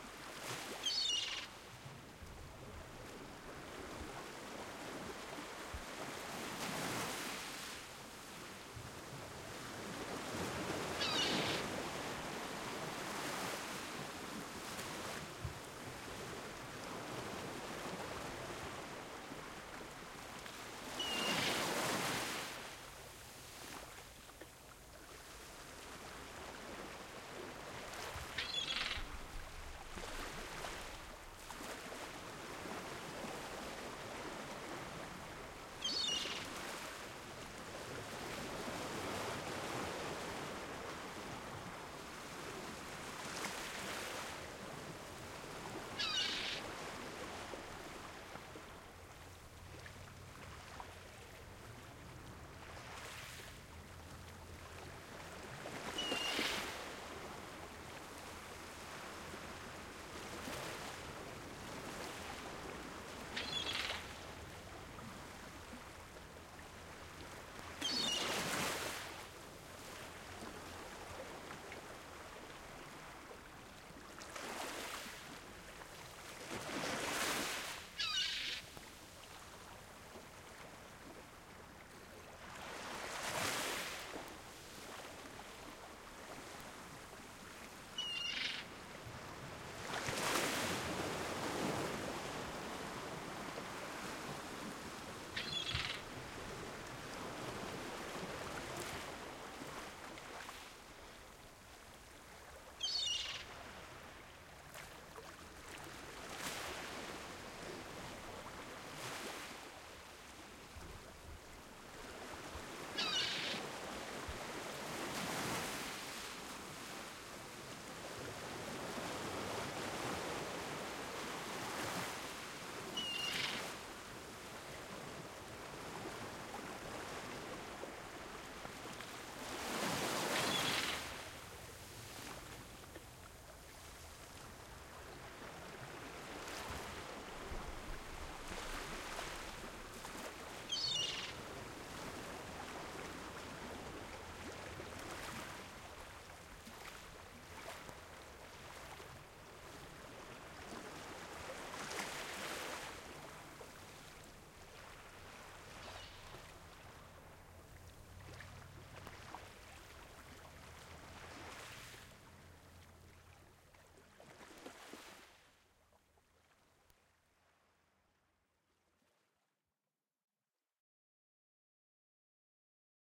Sea and seagulls stereo
Seaside/Beach atmos
seaside, atmosphere, beach, Seagulls